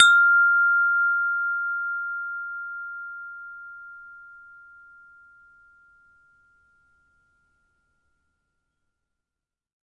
windchime tube sound
tube, sound, windchime